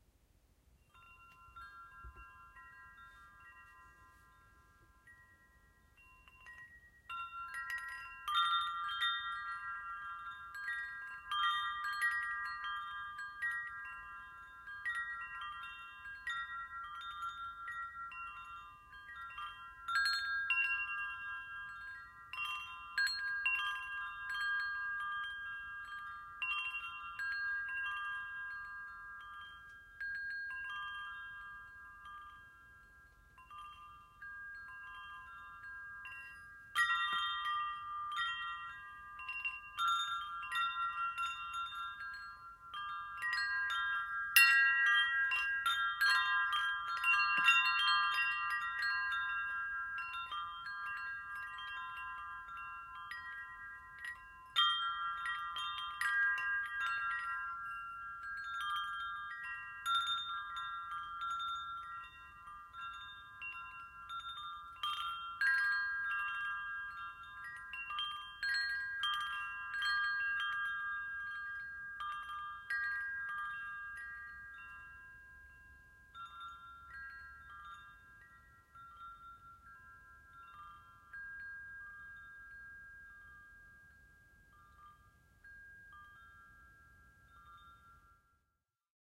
Wind chimes 1
Wind chimes chiming over a longer period of time. Recorded indoors to avoid wind blowing into the mic and ruining the recording.
background, chime, chimes, clank, foley, metal, metallic, ting, wind, windchime, windchimes